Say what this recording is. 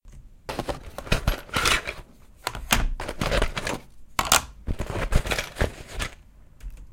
Rumbling etui
pencils, seek, etui, school, rumbling